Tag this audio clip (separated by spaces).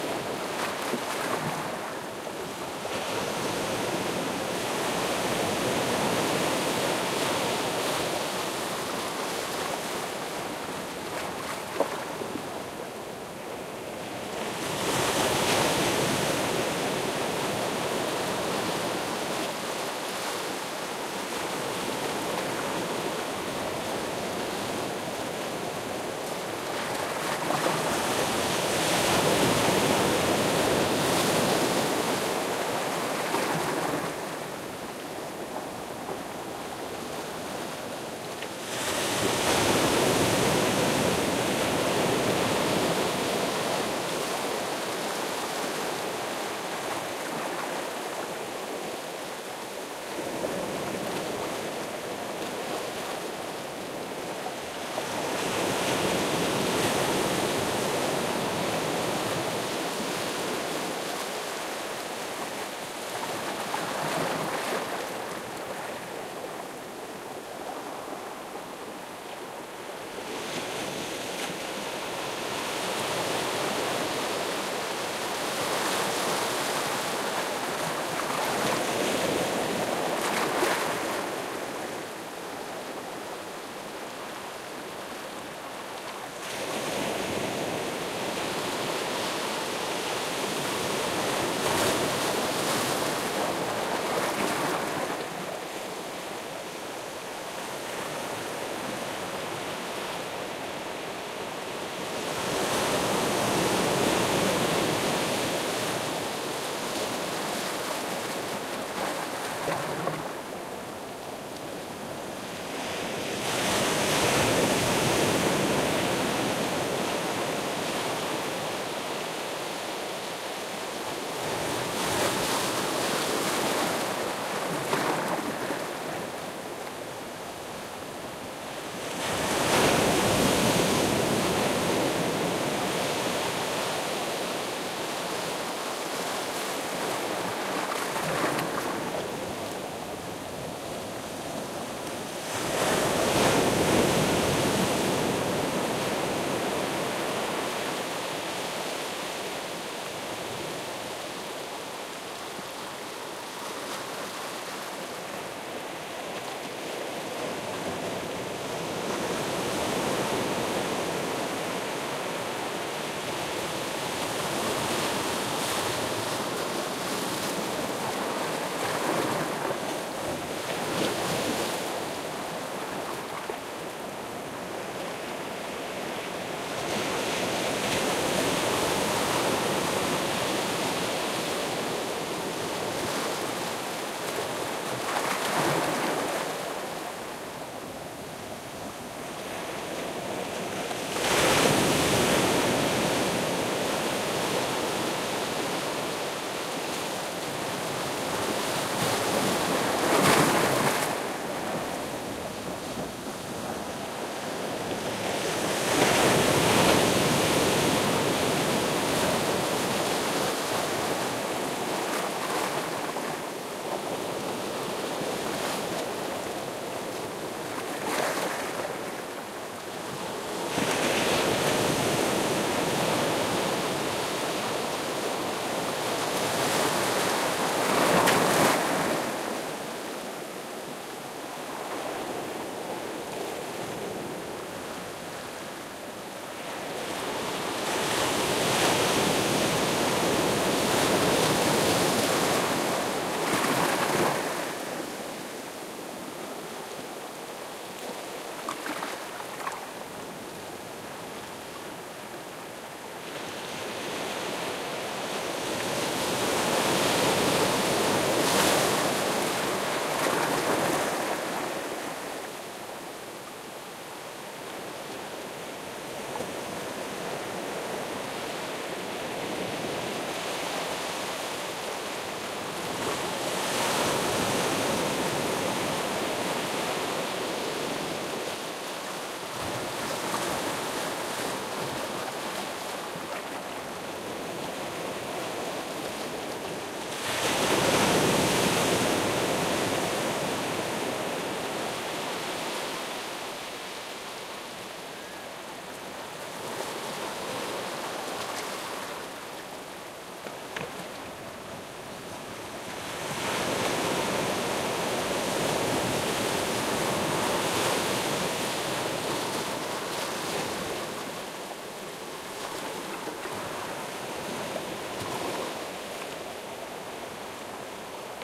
close lapping ocean splash portugal sea